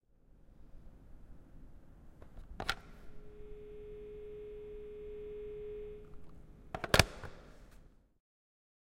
STE-014 Telephone Take, tone and leave
University telephone: take, tone and hang.